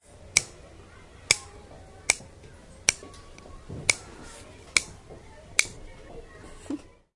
mySound WBB Yusuf wijzeboom
Sounds from objects that are beloved to the participant pupils at the Wijze Boom school, Ghent
The source of the sounds has to be guessed, enjoy.
wijze-boom, belgium, cityrings